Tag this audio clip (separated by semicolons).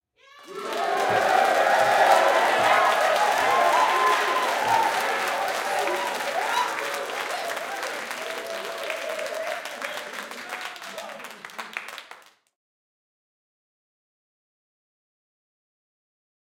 adults; group; rowdy; audience; crowd; applause; cheering; clapping